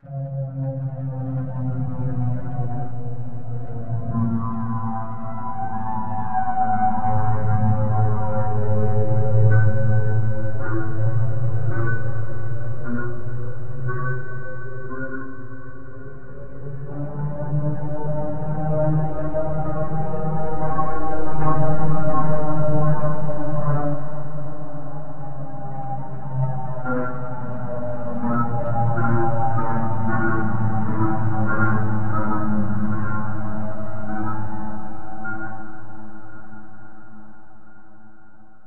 HV-Darkplane
It's like a bomber plane flying in big circles.
Made with Nlog PolySynth and B-step sequencer, recorded with Audio HiJack, edited with WavePad, all on a Mac Pro.